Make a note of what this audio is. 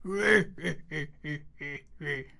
weird laughter 3
evil, laugh, laughing, laughter